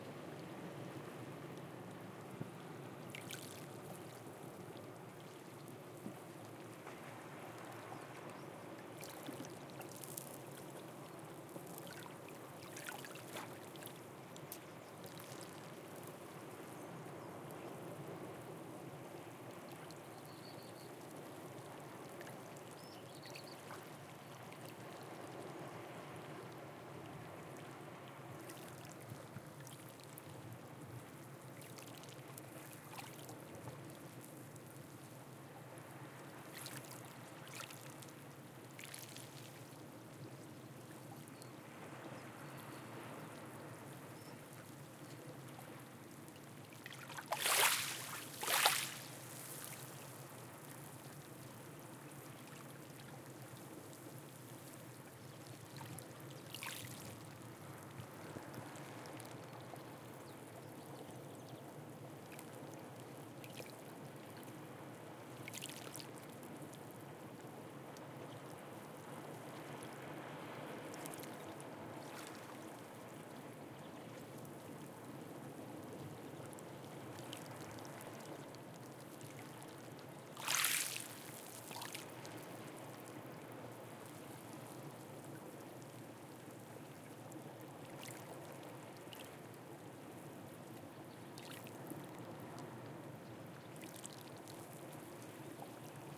fist plane of s sheashore on a stone beach
h4n X/Y